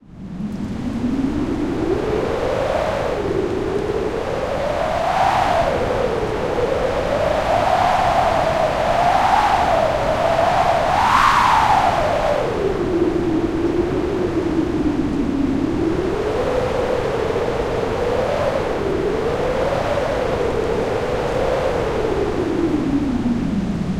CP Whipping Wind Storm Heavy02
This is a synthetic simulataion of a heavy windstorm. Some high gusts, some low.
storm, weather